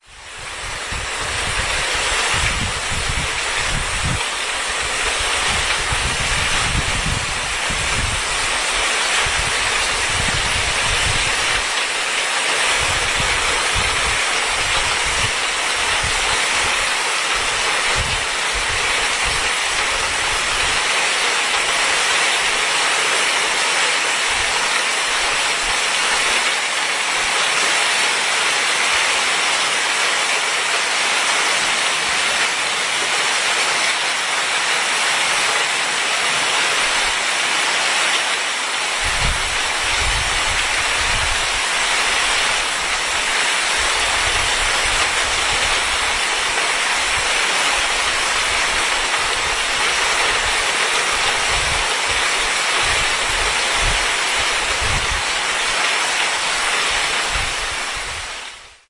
29 June 2009: the center of the city of Poznan (Poland, Greater Poland); downpour recorded on balcony (third floor); this year downpours are very often in Poland (especially south of Poland is a flooded area)
recorder: sony ICD-SX46
processing: fade in/out
rain, poznan, balcony, downpour, thunderstorm